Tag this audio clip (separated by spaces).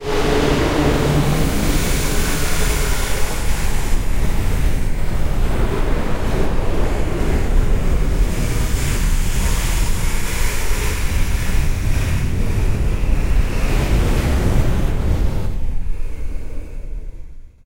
panicking pain psycho scare psychosis ghostly scary horrifying horror psychotic panic painful attack ghost